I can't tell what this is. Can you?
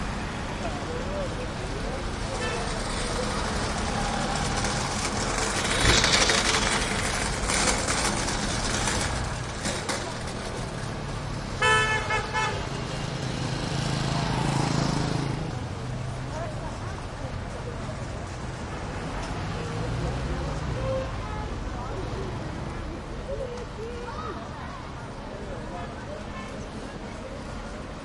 street medium traffic and people metal cart push by rattle loudly Gaza 2016
cart, metal, push, rattle, street, traffic